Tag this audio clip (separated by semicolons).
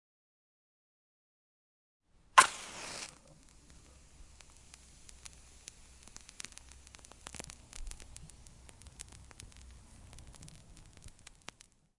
burning; CZ; Czech; fire; match; Panska; safety